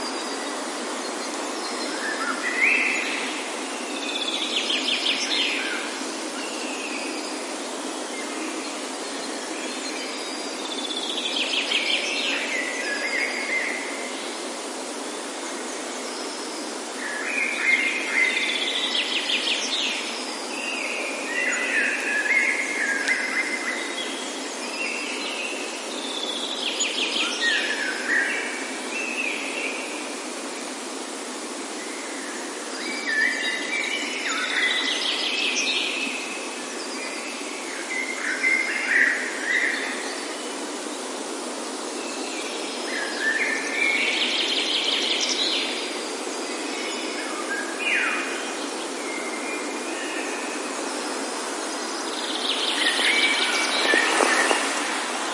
silent forest birds 210410 0059
birds singing in a silent forest
ambiance, ambience, ambient, bird, birds, birdsong, field-recording, forest, nature, spring